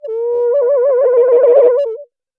A haunting warbling sound from a strange and flexible patch I created on my Nord Modular synth.
modular, digital, nord, synthetic, weird, strange, sound-design